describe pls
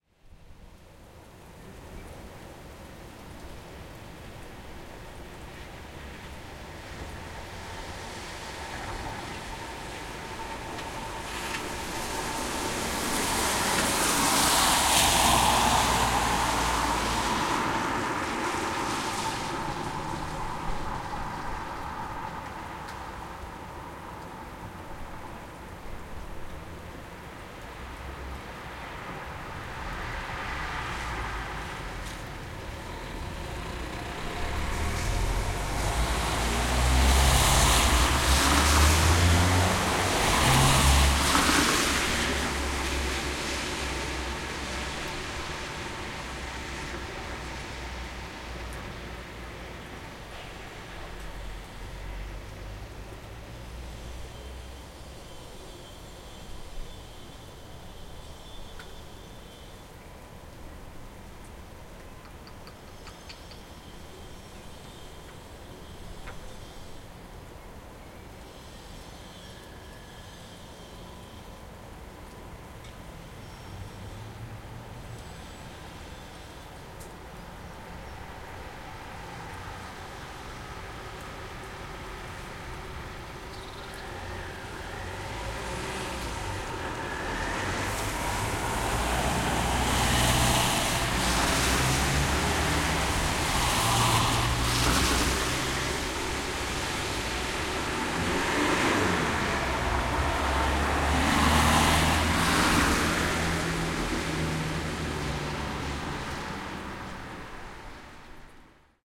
WET STREET
Ambiance d'une rue par temps de pluie (passages de voiture). Son enregistré avec un ZOOM H4N Pro et une bonnette Rycote Mini Wind Screen.
Street ambiance with rain (car passages). Sound recorded with a ZOOM H4N Pro and a Rycote Mini Wind Screen.
wet, city, vehicle, street, wet-road, road, ambiance, car, wet-street